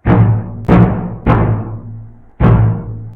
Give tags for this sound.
doumbek percussion

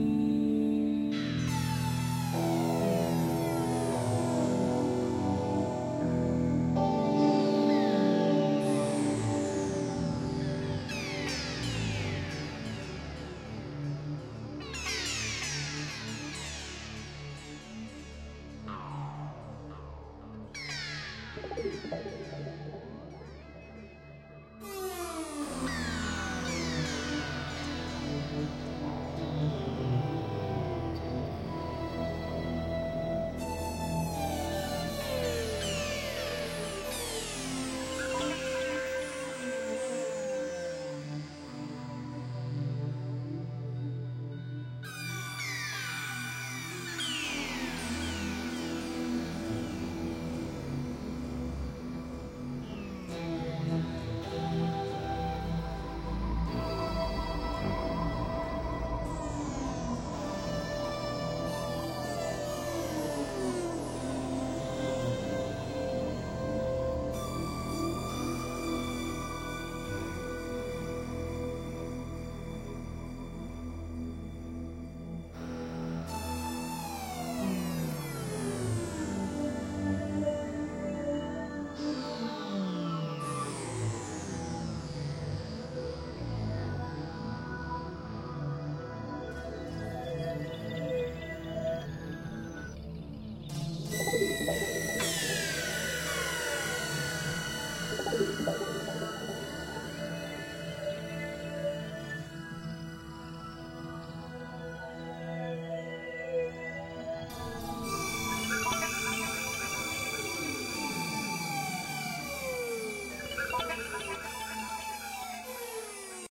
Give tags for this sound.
zapping falling

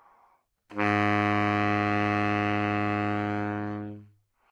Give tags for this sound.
neumann-U87 good-sounds sax single-note multisample baritone Gsharp3

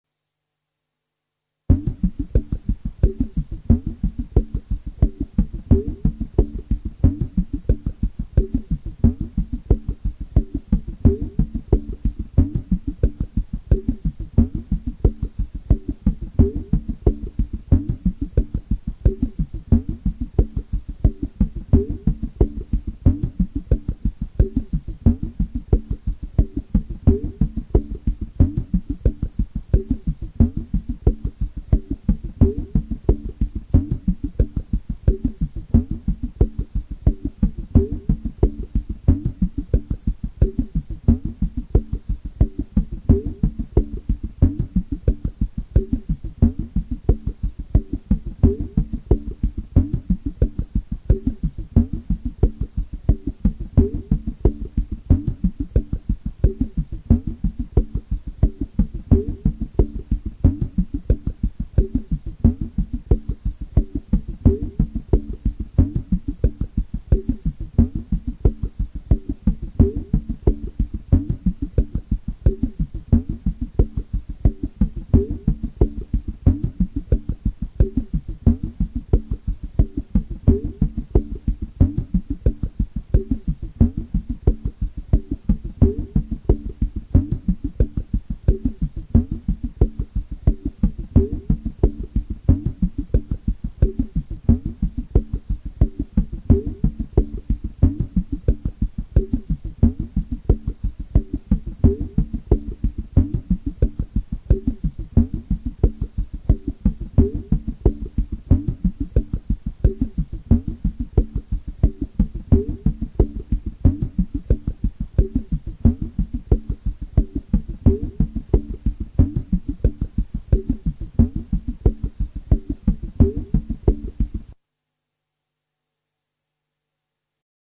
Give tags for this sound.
bass beat effects heart processed

